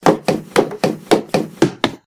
Kid running down the stairs
Very eager, and coming to a sudden stop.
Recorded for the visual novelette, "Trapped in a Soap Opera!".
feet, kid, running, stairs, stepping, staircase, stair, excited, walk, stairway, foot, walking, child, fast, steps, run, step, footstep, footsteps